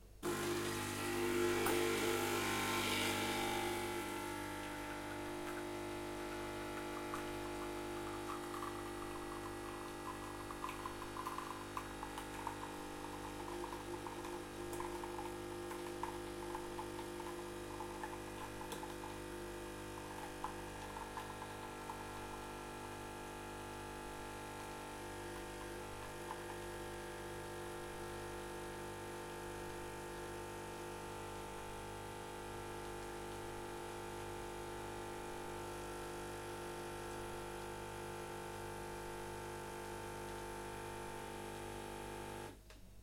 Nestle coffee machine making coffee from a capsule. Makes a loud buzzing noise.